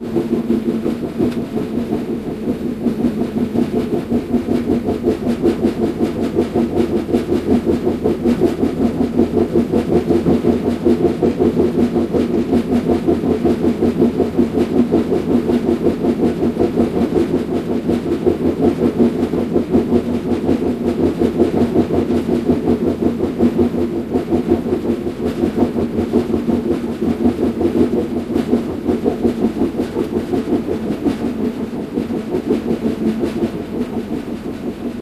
East midlands mainline train travelling slowly, lots of track noise. No passenger noise.